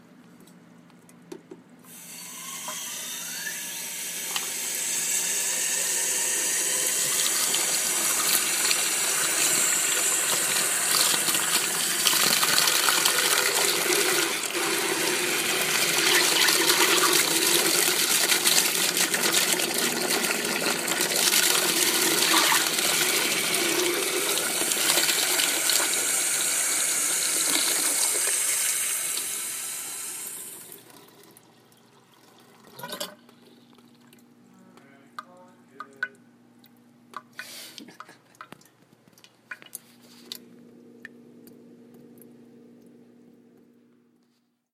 small sink slowly turning on, running for a bit, and slowly being shut off.
bathroom, drain, drip, faucet, foley, kitchen, pour, sink, water